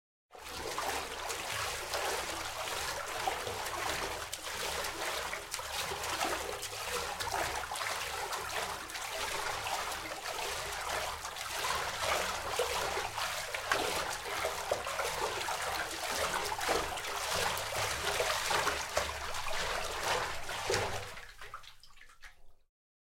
12 Swimming - Fast
Pansk, Water, Czech, CZ, Sport, Panska, Swimming, Fast